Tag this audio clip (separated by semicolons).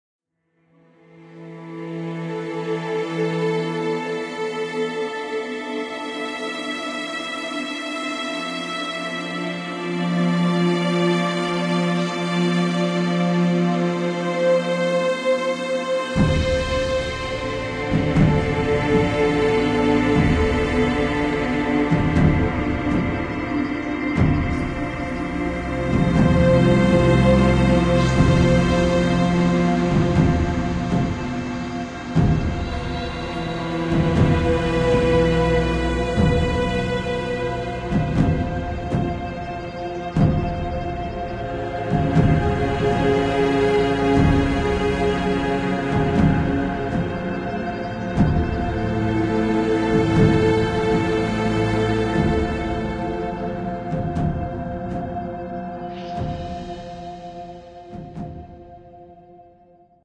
Level-loading; voices; ethnic; dramatic; scary; Loading; gothic; nightmare; classical; drama; creepy; atmos